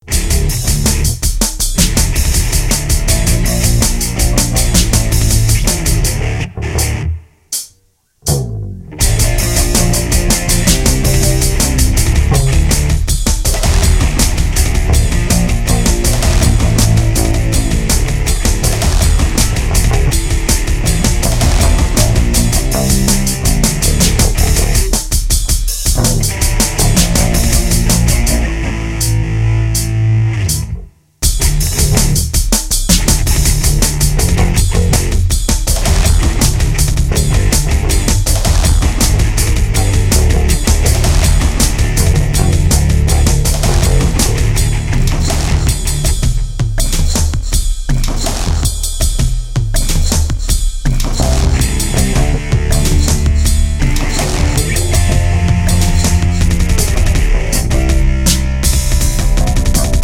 Metal Head
All the music on these tracks was written by me. All instruments were played by me as well. All you have to to is loop them and you'll have a great base rhythm for your projects or to just jam with. That's why I create these types of loops; they help me create full finished compositions. If you would like to check out my original music it is available here:
The-Road-to-Oblivion-2
Techno, Dubstep, Dub, Audio, Classical, Rap, Synth, Rock, Beats, Metal, Guitar, House, Traxis, Keyboards, Clips, Electro, Blues, EDM, Music, Original, Country, Jam